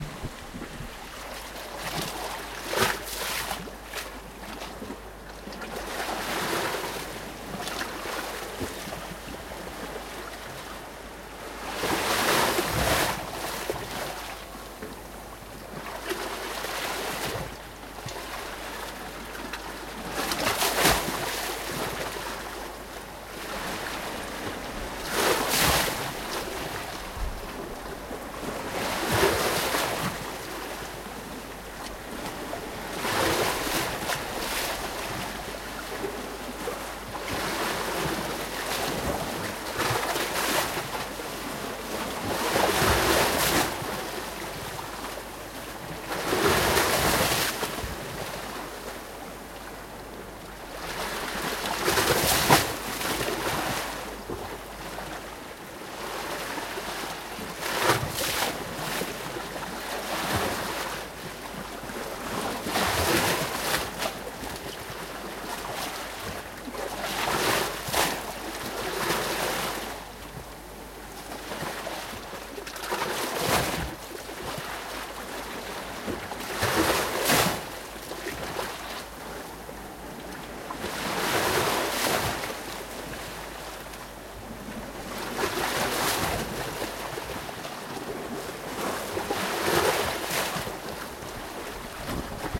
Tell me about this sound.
beach sea ocean waves crush breakwater
closer take to the sea waves
waves, sea, ocean, breakwater, beach, crush